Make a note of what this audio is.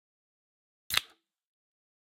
Opening Can 01
aluminum, beer, beverage, can, drink, metallic, object, soda